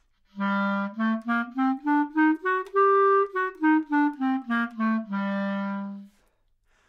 Clarinet - G natural minor
Part of the Good-sounds dataset of monophonic instrumental sounds.
instrument::clarinet
note::G
good-sounds-id::7636
mode::natural minor
neumann-U87, good-sounds, minor, scale, clarinet